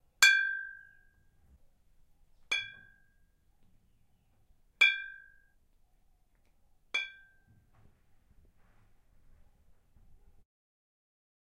Two bottles taping each other for cheers
Bottles, OWI, Taping